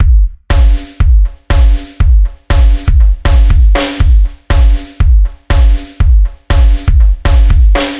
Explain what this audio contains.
Silene Drums 120 02
electronic drum beat loop compressed and saturated.
beat, drum, loop